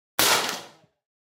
.22 caliber rifle shot.